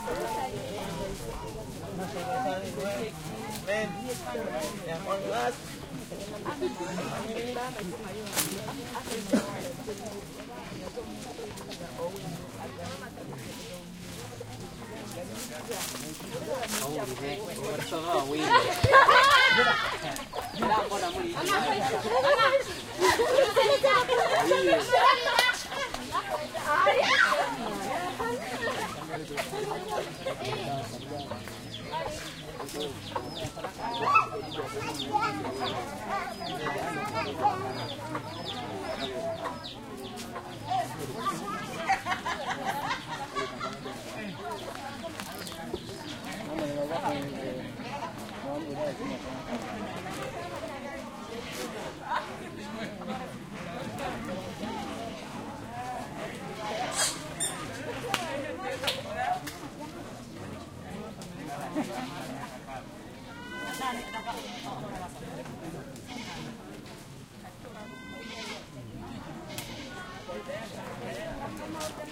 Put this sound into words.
village crowd active under mango trees talking laughing boisterous women Putti, Uganda, Africa 2016
village, Uganda, Africa, women